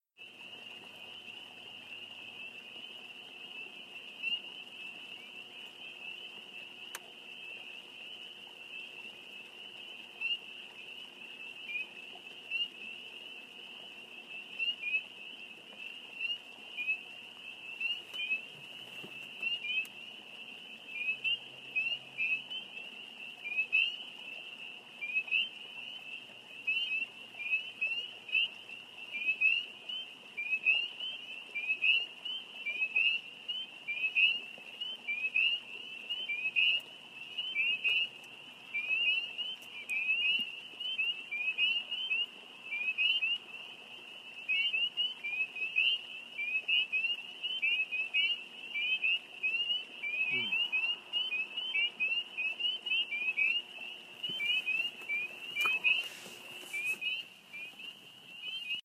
A field recording of the mating calls of 'Spring Peepers, a type of chorus frog, just after sunset in a swampy area with running water near the Catskill mountains of New York State.